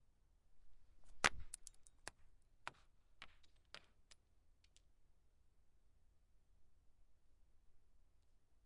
SFX Stone Calcit DeadSea Throw small #1-166
glassy stones slightly moving